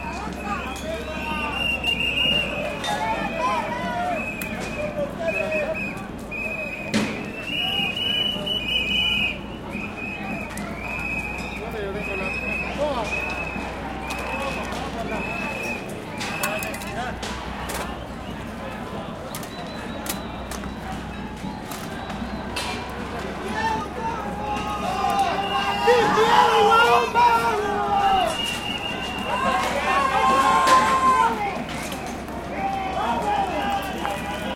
Protests in Chile 2019. The police arrive and the protesters calm down, then face each other slightly